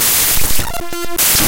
some small glitches and random noises